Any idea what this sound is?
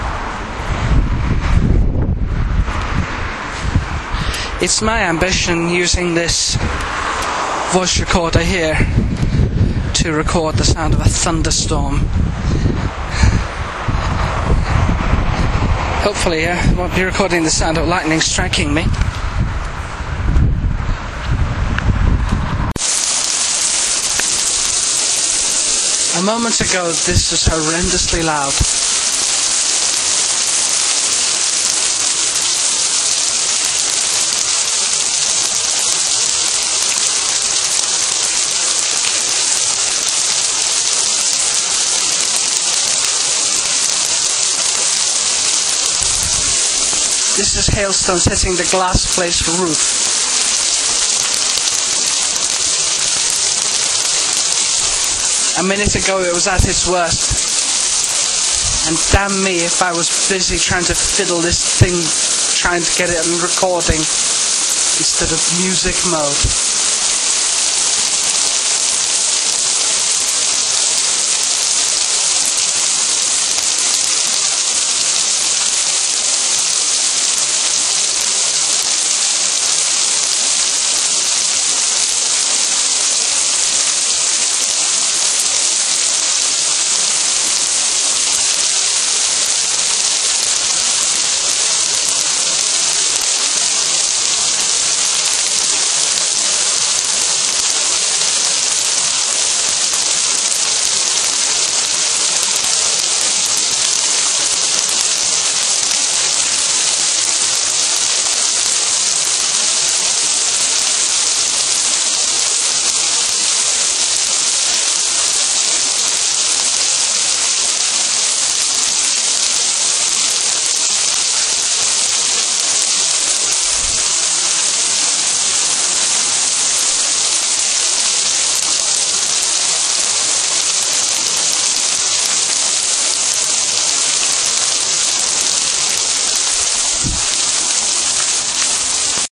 recording the sound of hailstones
Field recording of hailstones, plus external and internal commentary by the file's author.
commentary, field-recording